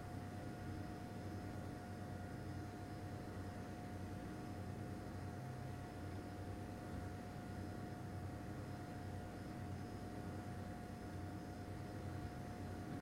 Computer Noise
The droning sound of a computer: Its high pitched whine and drone of CPU fan.
drone, computer, noise